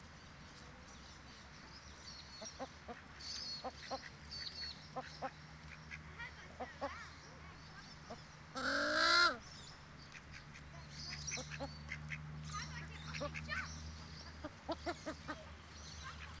Australian-WoodDuck3
I was at the park feeding two types of Duck 1. In this recording you can hear some children in the background along with other birds.
people, wood-duck, ambience, park, field-recording, bird, duck